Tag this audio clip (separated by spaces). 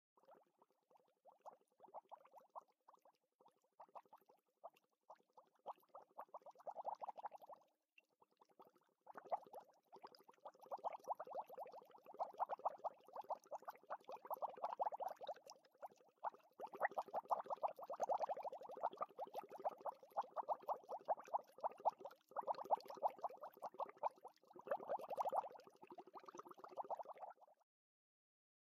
Beaker Bottle Bubbles Bubbling Chemistry Lab Laboratory Water